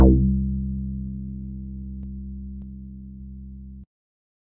Sample00 (acid-B- 1)
Acid one-shot created by remixing the sounds of
synth, acid, 303, one-shot, tb